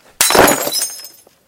Includes some background noise of wind. Recorded with a black Sony IC voice recorder.